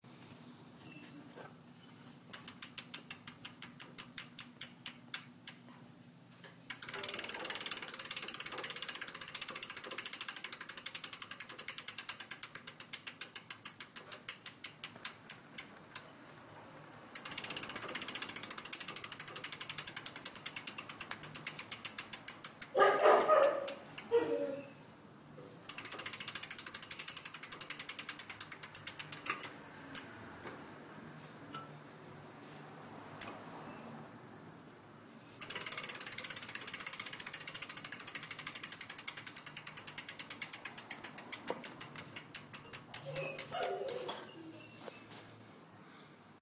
roda bike, bicicleta